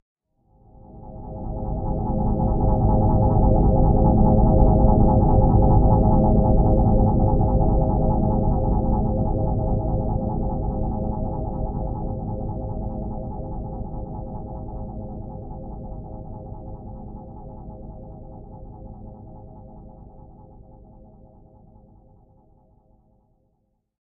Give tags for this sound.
soundeffect; ppg; lfo; multisample

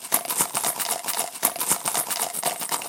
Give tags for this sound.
Coins; Money; Shaking

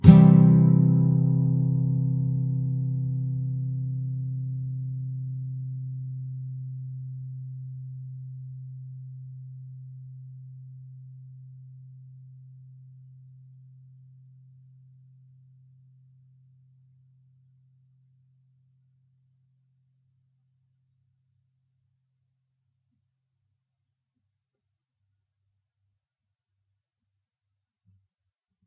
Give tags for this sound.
acoustic; clean; guitar; nylon-guitar; open-chords

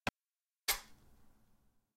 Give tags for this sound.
Box; Cardboard; Experimental; Knife; Scratch; Slash

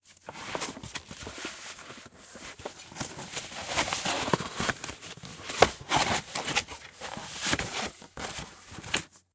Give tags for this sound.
scooting; handling; foley; cardboard; paper

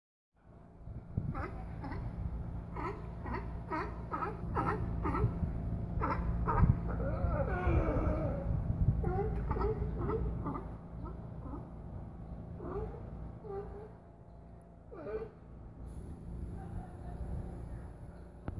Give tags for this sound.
dock; field-recording; sea-lions

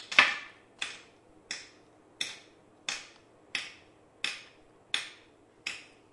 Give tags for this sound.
SonicSnaps; January2013; Essen; Germany

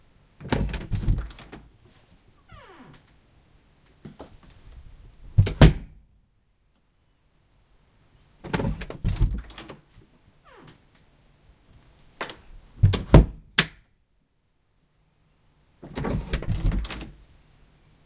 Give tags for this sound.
Creak; Door; Squeak; Squeaking

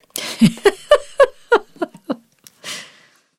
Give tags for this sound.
joy; author; guffaw; female; voice; jolly; giggle; book; npng; empirical; voiceover; over; happiness; laugh; woman; humour; josephson; girl; humor; mic; microphone; close; labs; novel; c720; laughter; mirth; funny; story

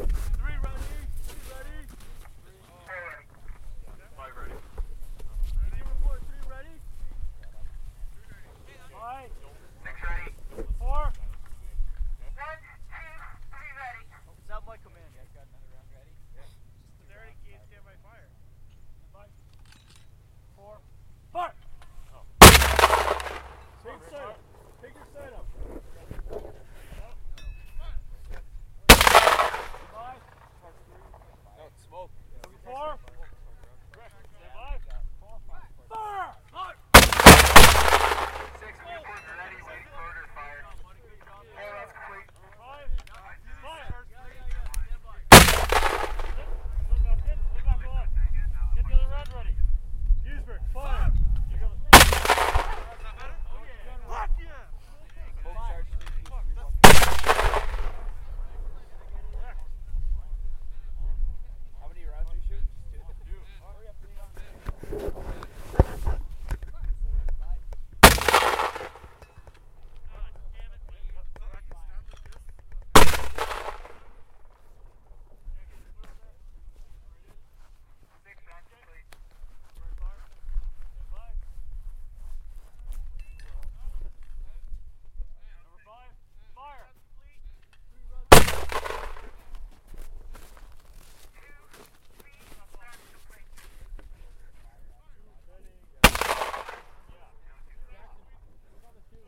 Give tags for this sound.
81mm; explosion; attack; bomb; battle; fight; weapons; explosions; mortar; projectile; military; army; gunfire; war; artillery

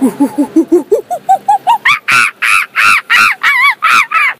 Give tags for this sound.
female; voice; vocal; 666moviescreams; scream; monkey; human; sound

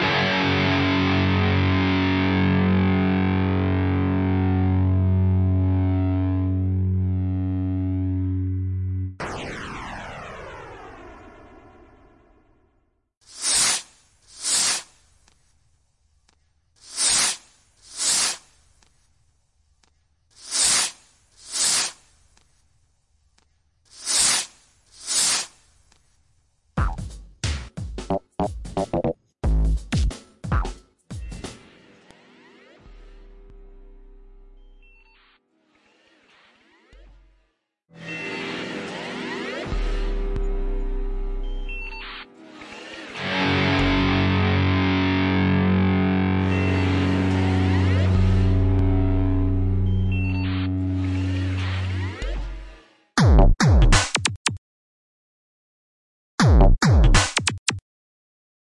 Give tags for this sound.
game; space

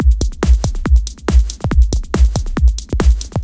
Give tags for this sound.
drum; loop; 04

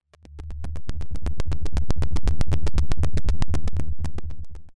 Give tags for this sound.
8bit; helicopter; videogame

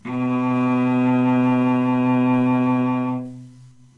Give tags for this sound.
note; stringed-instrument; cello; violoncello; string; B; scale

